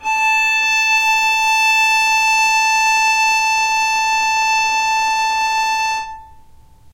violin arco non vib A4
violin arco non vibrato
arco, non, vibrato, violin